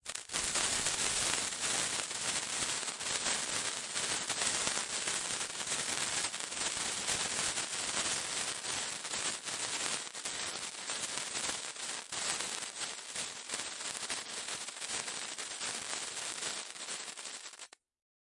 Party Pack, Sparkler, Still, 01-02
A firework sparkler being ignited, idling, then extinguishing naturally, all while remaining still without movement. A sound from one of my recent SFX libraries, "Party Pack".
An example of how you might credit is by putting this in the description/credits:
And for more awesome sounds, do please check out the full library or my SFX store.
The sound was recorded using a "Zoom H6 (XY) recorder" and "Rode NTG2" microphone on 7th June 2019.
party, still, fizz, pack, sparkler, firework, hiss, sparks